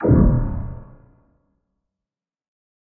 Sci Fi sounding hit reminding of an electro magnetic shield being struck. This can also be used for firing futuristic weapons.
game, laser, machine, mechanical, robotic, science-fiction, sci-fi, sfx, weapon